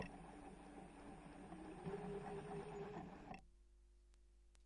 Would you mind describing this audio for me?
Queneau machine à coudre 42
son de machine à coudre
coudre, industrial, machine, machinery, POWER